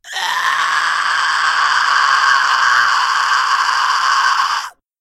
Pig Squealing recorded by Alex